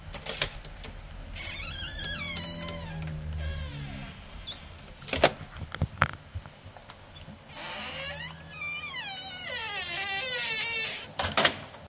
Door Hum and Whine
A door opens with a low hum, then closes with an additional accompanying whine.